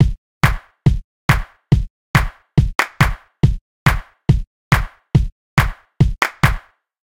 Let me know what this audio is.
Dance loop 140bpm
Clap, Drum, House, Electro, Kick, Dance, Loop, EDM, Techno